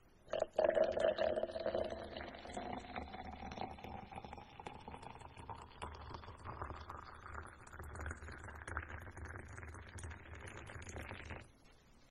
Filling tea cup
Pouring hot water into tea-coffee-etc cup
cup, liquid, into, pouring